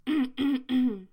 Just the sound of someone (namely me) clearing their throat.